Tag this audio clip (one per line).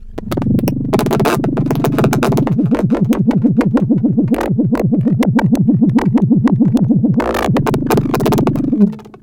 benjolin
electronic
synth
circuit
noise
sound
analog
hardware